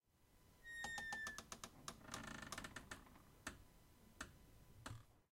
closing door slowly